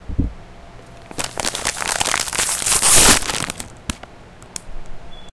opening bag of chips
bag, chips, doritos